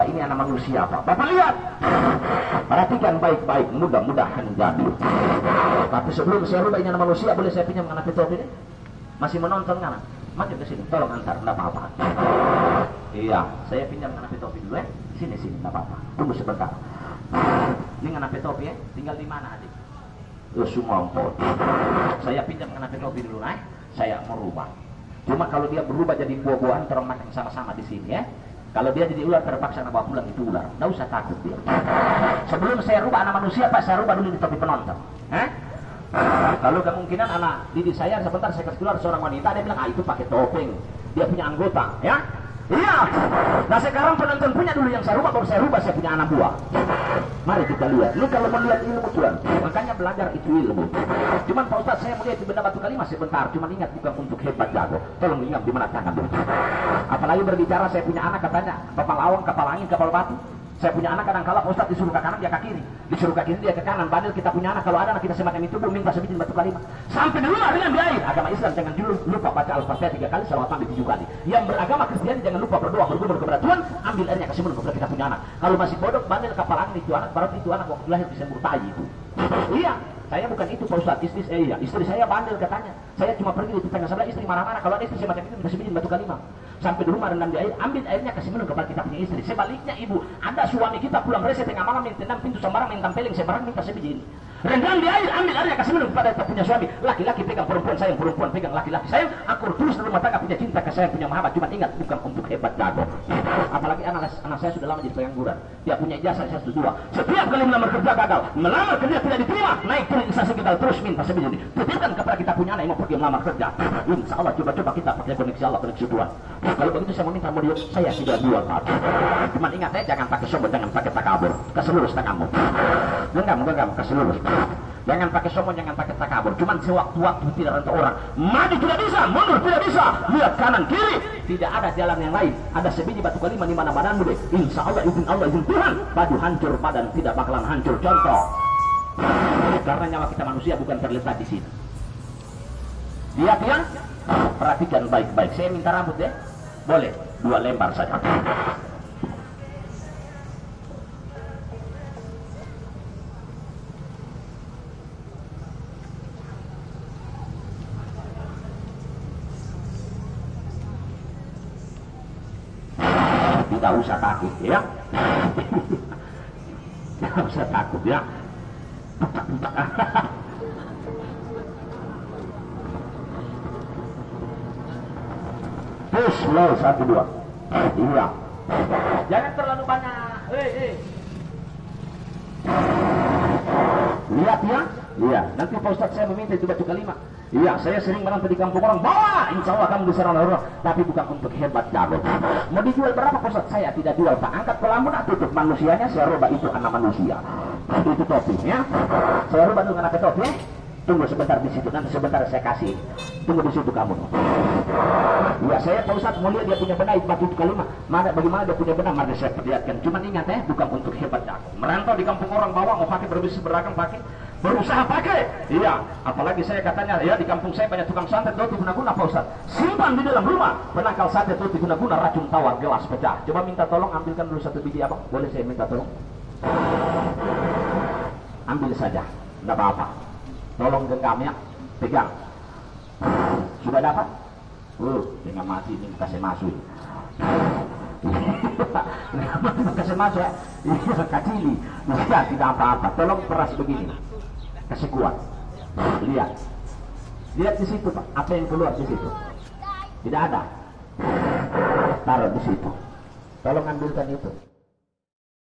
Dalang Trance Master - Manado, Indonesia
Field-recording of a street Jathilan trance ceremony, made in Manado, Sulawesi (Celebes), Indonesia. Broken glass is chewed, bloody self-inflicted cuts are made with a razor and some guy is put into a trance by an MC (dalang) who is all parts magician/charlatan/holy man.
animist, Asia, Bahasa, Celebes, ceremony, charlatan, dalang, folk, holy, holy-man, Indonesia, Jathilan, magic, Manado, pawang, penimbul, possession, religion, ritual, spirit, spiritual, Sulawesi, supernatural, traditional, trance, voodoo